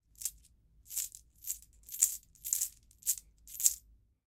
coin jangle in hand fast
a handful of change jangling around
change, jingle, coins, jangle, hand